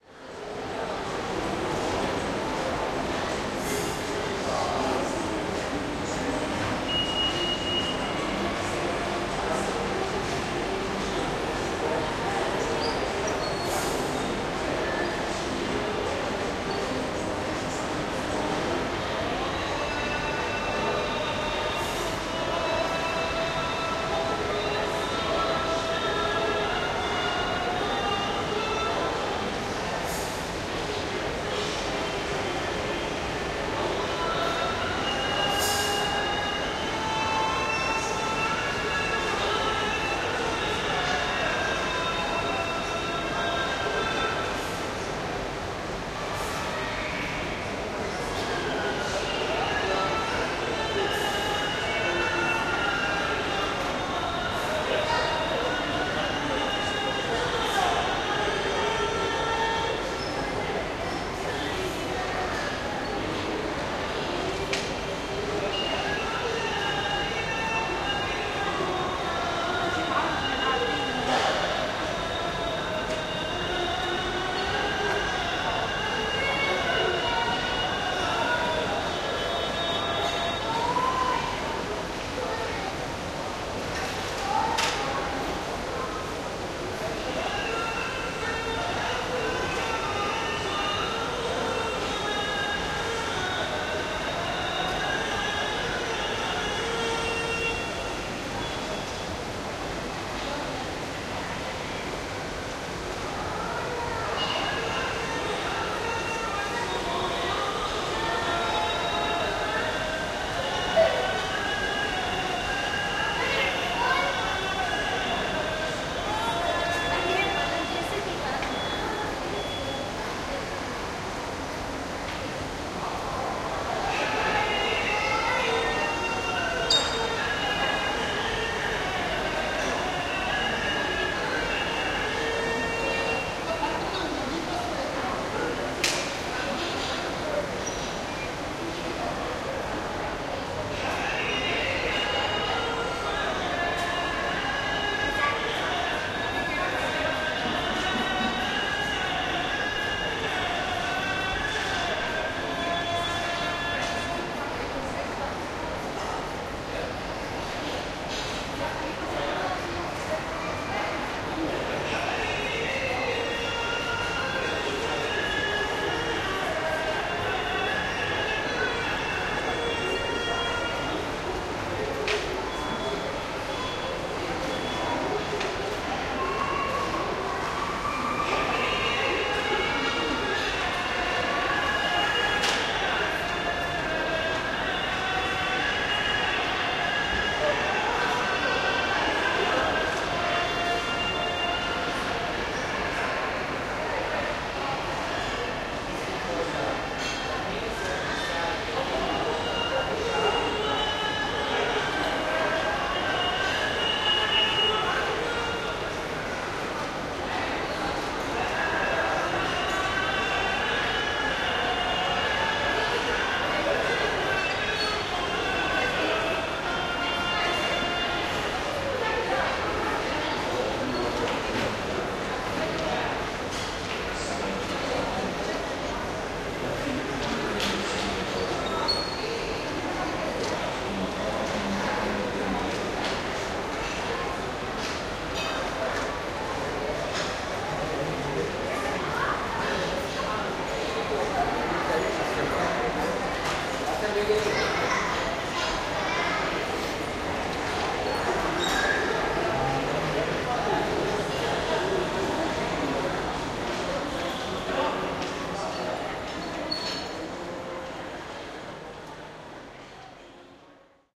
Call to Prayer at Marina Mall
Call to prayer recorded inside Dubai Marina Mall, as shoppers continue about their business.
azan, mall, shopping, adhn, Call-to-prayer